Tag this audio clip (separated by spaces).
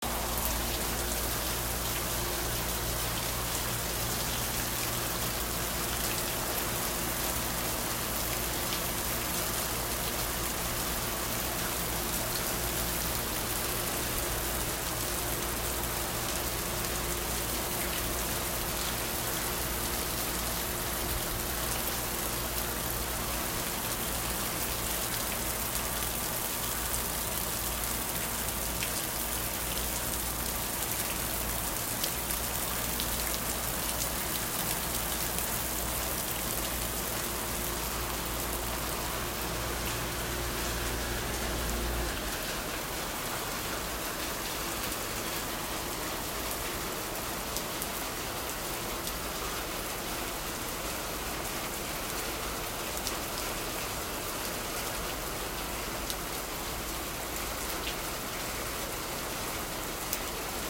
dripping rain raindrops raining